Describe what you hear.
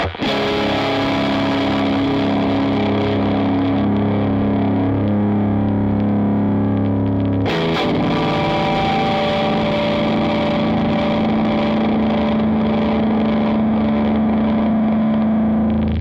guit. noise
Noise, attack and noise!
distorsion, guitar, noise